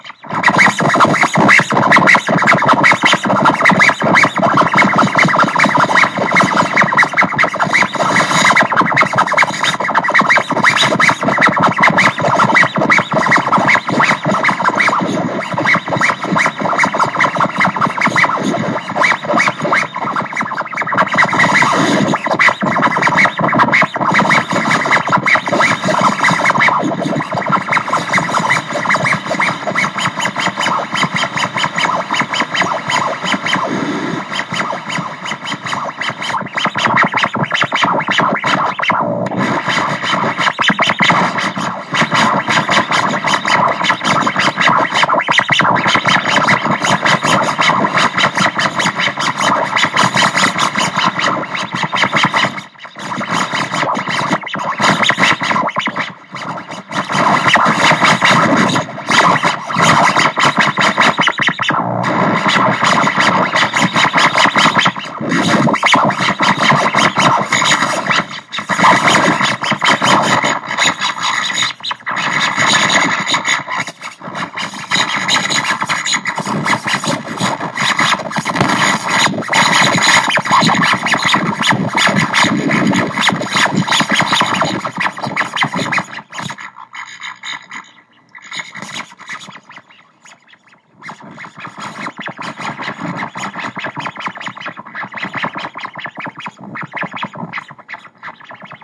Radio interference
Two Sennheiser g3 transmitters were set for the same frequency; one of them was transmitting a previous interference recording from my macbook p2 phone output, the other one had no input;
the receptor was connected to loudspeakers; the interference would vary as I moved each transmitter around.
I recorded the loudpeakers output through the macbook built-in microphone, on Audacity.
This is a specially noisy part I exported.
Not a pretty sound, but may be useful.
interference, static